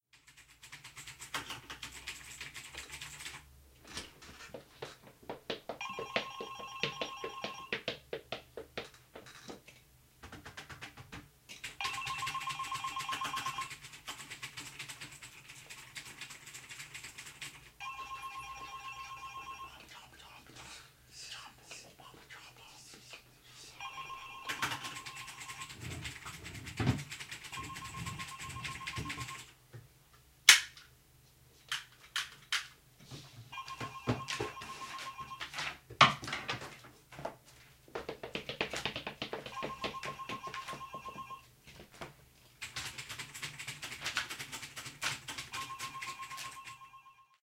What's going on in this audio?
55-Sonido Ambiente Oficina
Sonido ambiente oficina